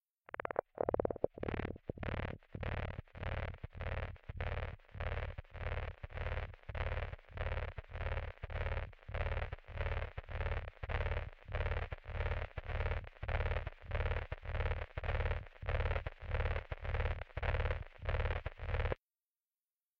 A pulsar I made
transmission, radio, space, pulsar